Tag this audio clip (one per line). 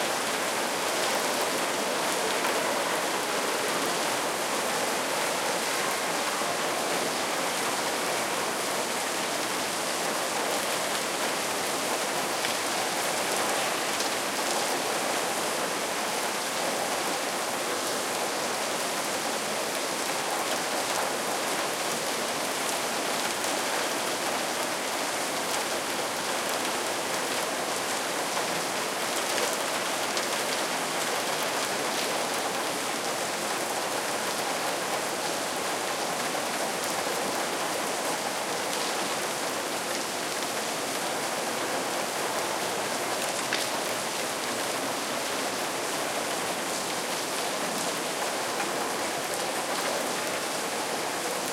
ambience
downpour
drip
dripping
field-recording
heavy
lightning
nature
rain
raining
shower
splashing
storm
street
water
weather